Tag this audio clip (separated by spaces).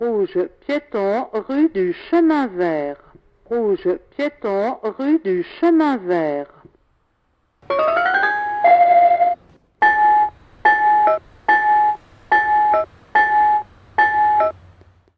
Traffic-light audible-traffic-light aveugles blind feux-pi feux-rouges pedestrian-signal rue-du-Chemin-VertParis tons visually-impaired